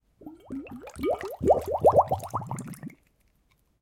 water bubbles 02
bubbling, liquid, water, bubble